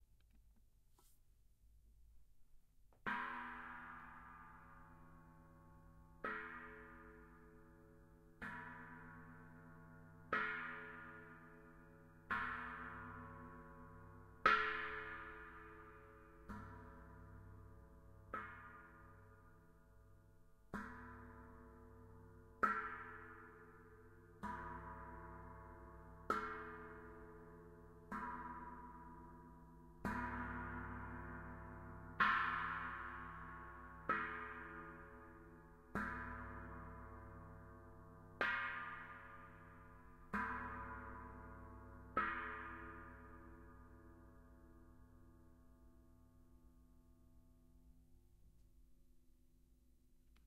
drum sound crash
percussion, improvised